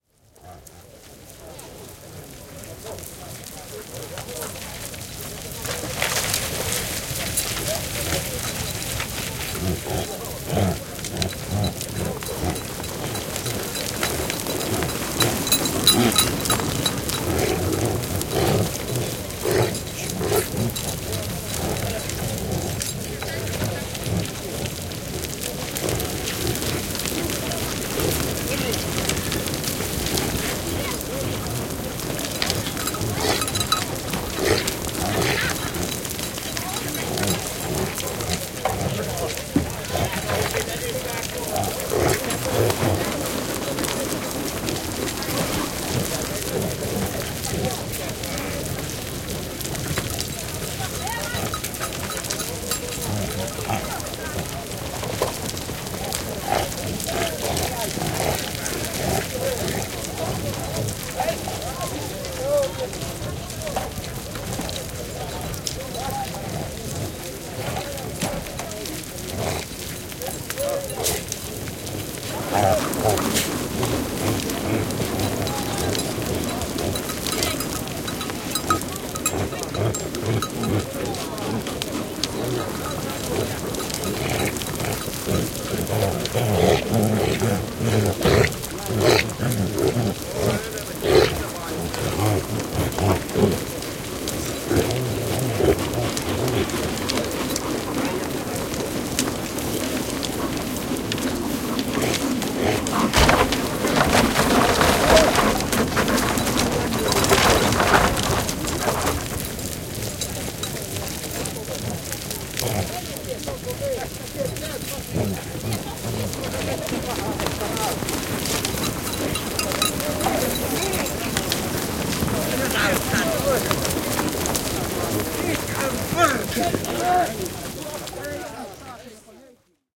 Lappi, poroerotus, porot ajetaan kirnuun / Lapland, reindeer round-up, separation, a herd of reindeer is driven into a churn, bells, grunting, dog, human voices
Lauma poroja aitauksessa, porot ajetaan kirnuun, ääntelyä, roukumista, kelloja, koira haukkuu, ihmisääniä.
Paikka/Place: Suomi / Finland / Inari, Hirvassalmi
Aika/Date: 13.01.1977
Field-Recording, Finland, Finnish-Broadcasting-Company, Lapland, Lappi, Poro, Porotalous, Reindeer, Reindeer-farming, Soundfx, Suomi, Talvi, Tehosteet, Winter, Yle, Yleisradio